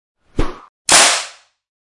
whip crack
layered foleys(5). Approximate sound of the crack of a whip.
crack, whip, switch